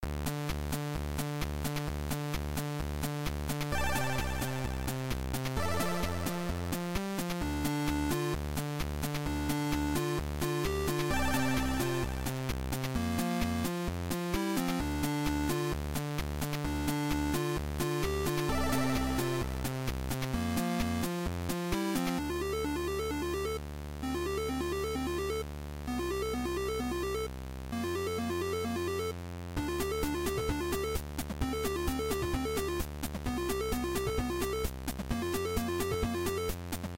Storm RG - Cool Journey
130bpm loop created for the Android game Storm RG.
space, chiptune, loop, video-game, retro, 8-bit, electro, spaceship, arcade